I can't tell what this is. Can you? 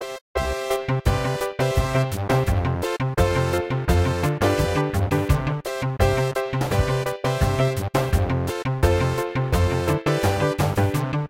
Atari game masters loop
Old school loop soundtrack